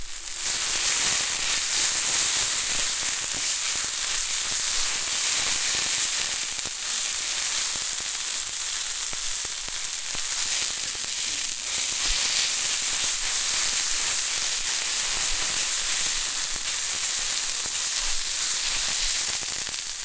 Roasting in a pan.